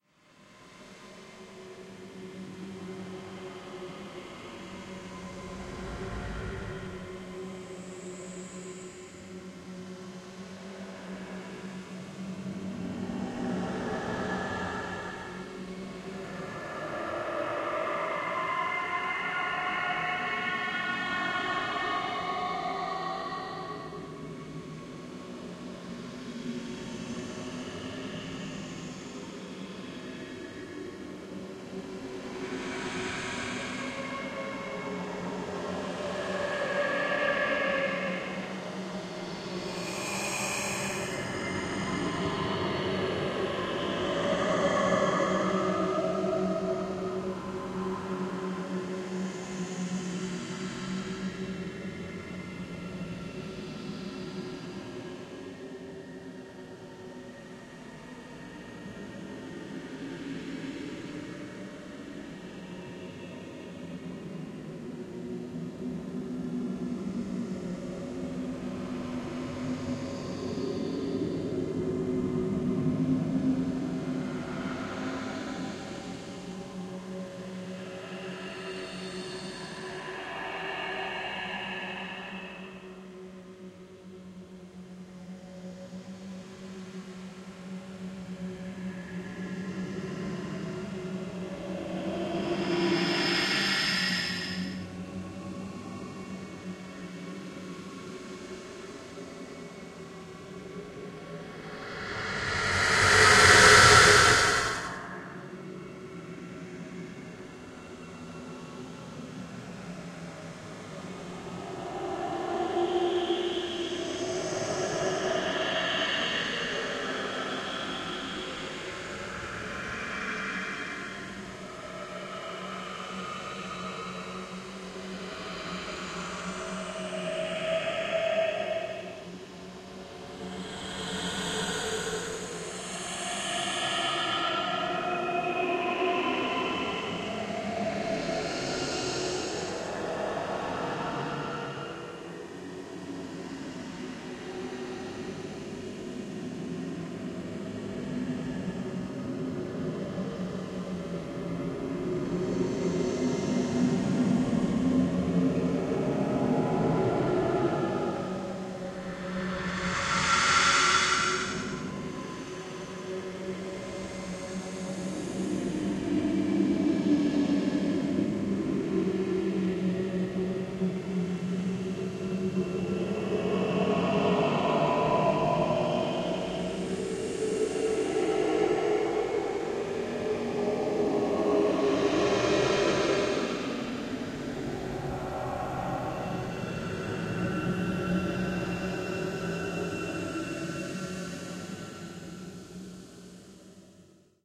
sound from home to horror soundscape
sounds from home manipuleret with audacity effects and paulstretch.
horror, spooky, horror-effects, scary, creepy, horror-fx